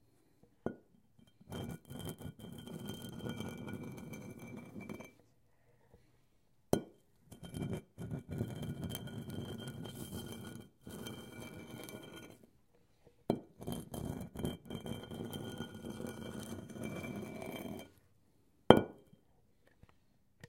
Sounds of a kettlebell on concrete, dragging and impact